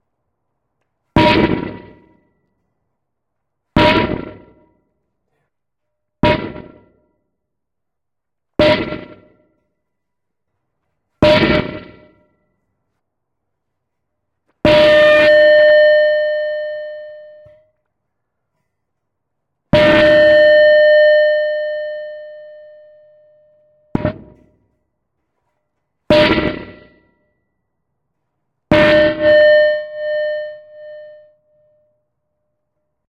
The file name itself is labeled with the preset I used.
Original Clip > Trash 2.